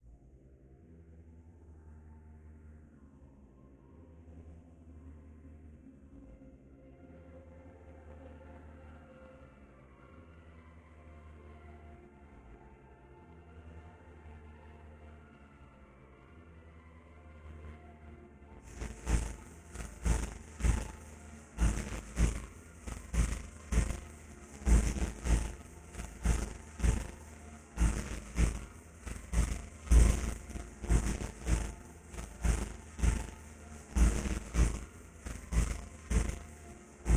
Sunny Cities and who they remind me off that I have been too in the past 5 years. Ambient Backgrounds and Processed to a T.
backgrounds, processed, tmosphere, valves, copy, distorted, glitch, cuts, ambient, clip, soundscapes, pads, atmospheres, rework, paste, heavily, saturated